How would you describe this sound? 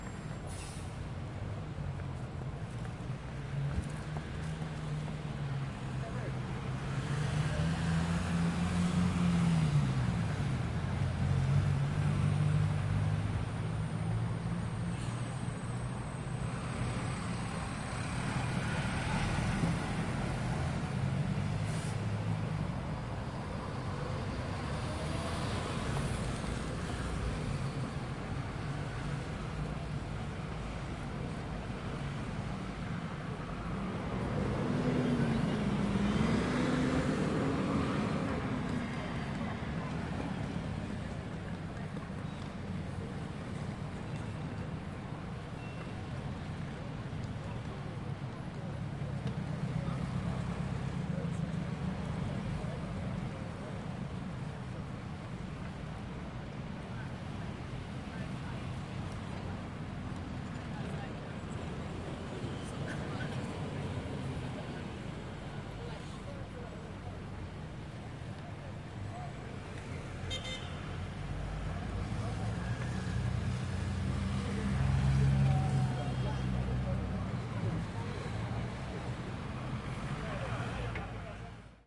Street City Traffic Busy London
London, City, Traffic, Busy, Street